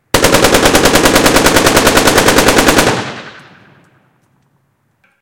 AK47 Fire into Street
Sounds recorded by me for my previous indie film. Weapons are live and firing blanks from different locations as part of the movie making process. Various echoes and other sound qualities reflect where the shooter is compared to the sound recorder. Sounds with street echo are particularly useful in sound design of street shootouts with automatic weapons.
Weapon ID: Russian AKM (Newer model of the AK-47) - 7.62x39mm
warfare, combat, police, Rifle, war, gunfire, firefight, AK47, military, Army, Assault, shootout, firearms, training, SWAT